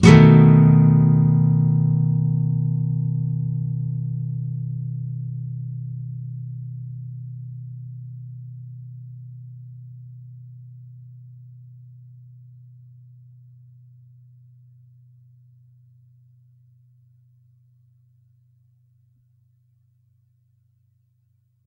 B7th up
Standard open B 7th chord. 2nd fret 5th string, 1st fret 4th string, 2nd fret 3rd string, open 2nd string, 2nd fret 1st string. Up strum. If any of these samples have any errors or faults, please tell me.